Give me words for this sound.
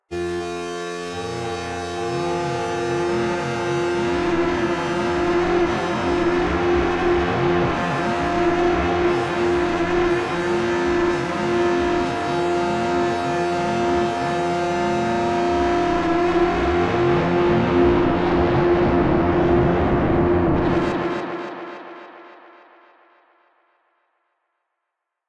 A strong, aggressive electronic growl/scream.
Created with Reaktor 6.